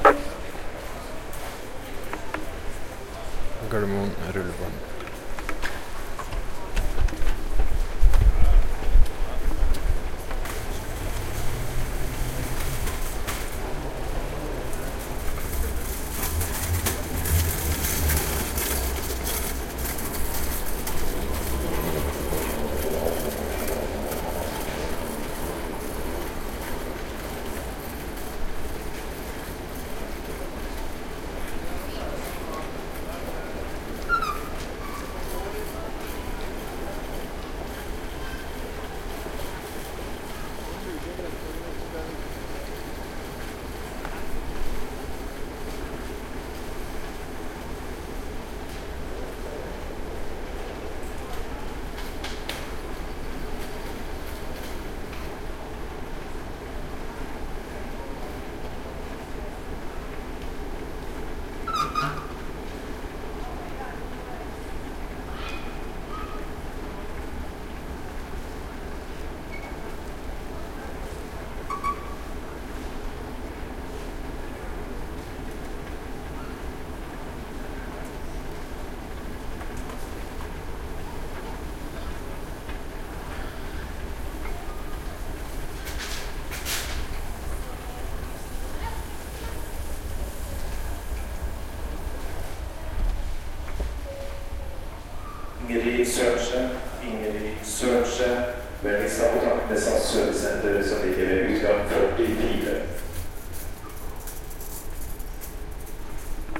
This recording is done with the roalnd R-26 on a trip to barcelona chirstmas 2013.